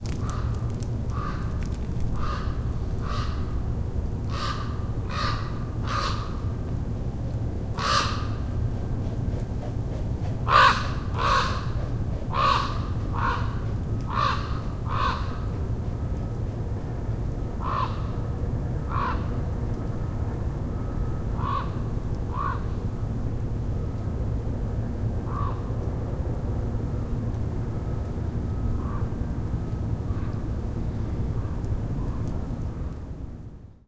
A raven flying by overhead in a forest on Gabriola Island off the coast of BC. Some droney ambiance from steel mill across the water in Nanaimo. As the raven flies overhead, it is remarkable how well the mic picked up the flapping of its wings. Recorded with a Zoom H2.
caw, flyby, wing